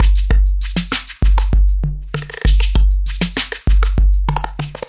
DM 98 808warped full
Vintage drum machine patterns
Machine, Drums, Electronic, Vintage, Trap, Electro, House, Electric, Drum, DrumLoop, IDM, Retro, Loop, Beat